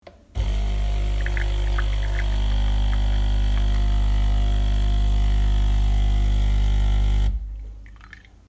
Coffee machine
drink, machine